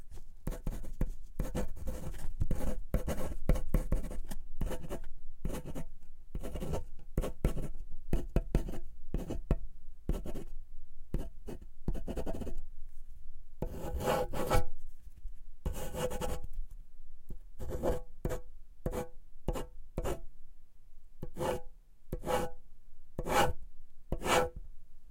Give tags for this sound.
drawing; paper; Pen; uniball; write; writing; scribble

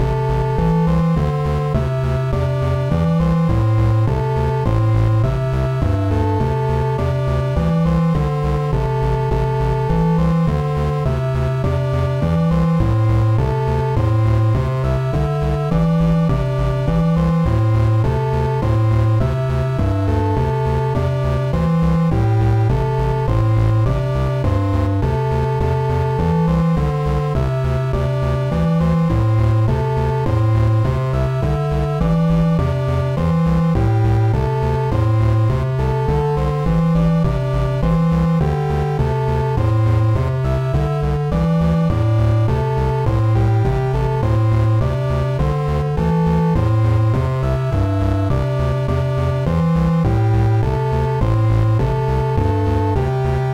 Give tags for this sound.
8bit; beepbox; Chiptune; computer; cool; curve; effect; learning; loop; melody; music; old; original; retro; sample; school; sound; tune

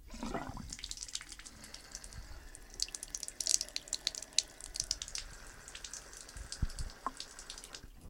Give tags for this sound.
bathroom; cran; recording; sample

